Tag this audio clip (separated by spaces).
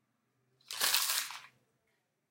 arrugar pl plastic stico transition